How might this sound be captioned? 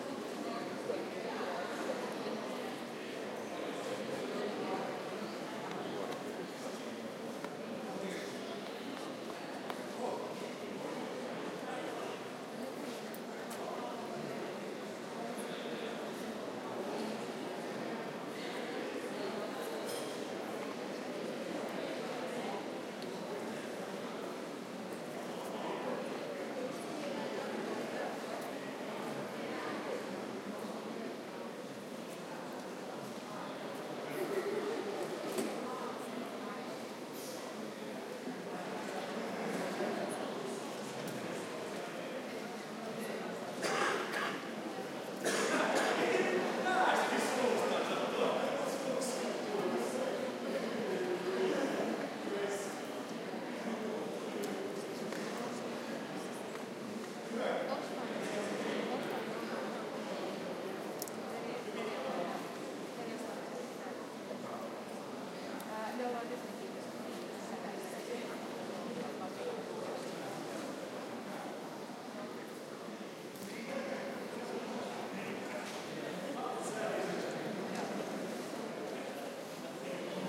Crowd ambience
The sounds of an audience waiting for the show and buzzing with each other.